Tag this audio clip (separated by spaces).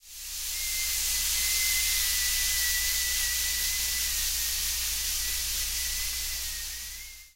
cooking kitchen pot pressure stove